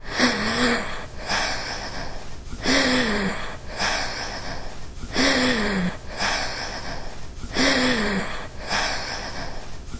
You can hear the heavy breath of a female while she was running with difficulties. It has been recorded in a recording classroom at Pompeu Fabra University.
UPF-CS14, breathing, campus-upf, difficulty, heavy